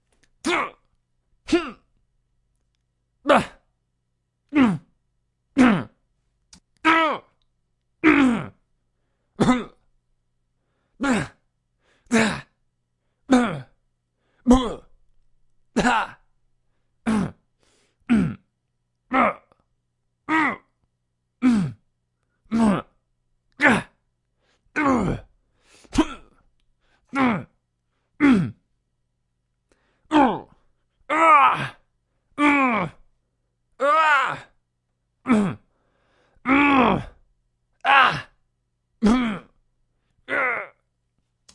I recorded a bunch of grunt noises I needed for an action short I shot with a few friends. Both short and long grunts; some are more of a yell. Recorded with an Audio-Technica condenser mic.